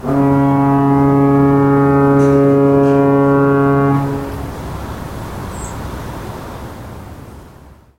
A fog horn in San Francisco Ca. USA 2010